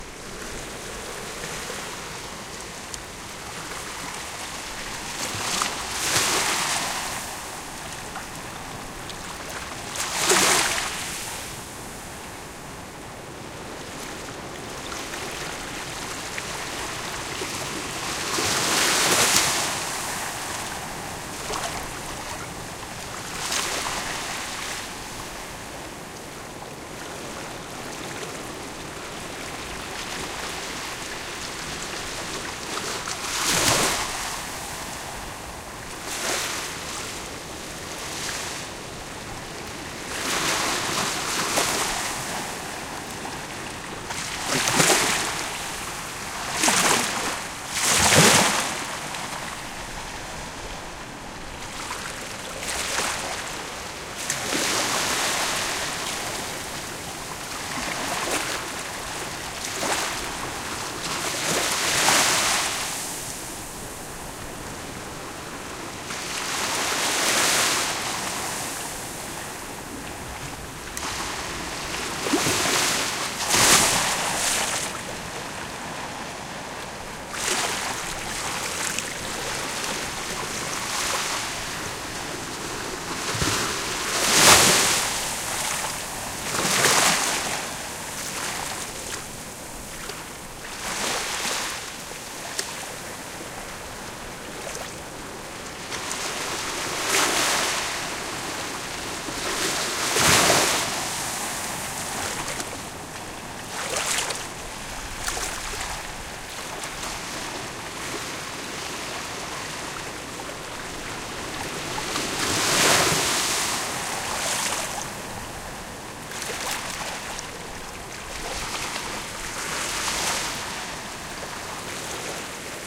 2. waves, close recording, beach, portugal
A very close recording of waves coming to the beach. I did it to get more details on the small differenc sounds waves are producing.
portugal, water, shore, sea, beach